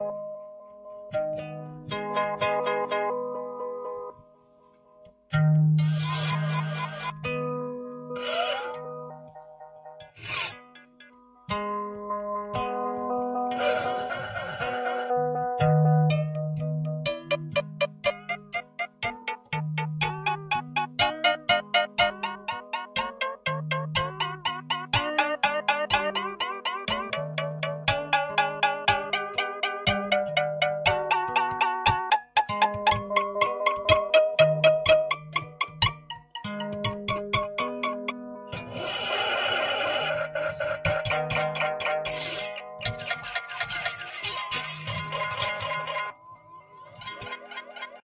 playing with a box micro contact